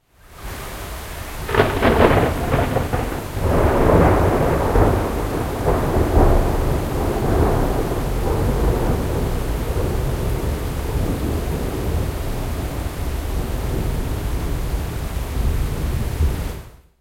Thunder and rain as recorded with an Edirol R09 on the 8th of June 2007 in Amsterdam
street-noise, thunder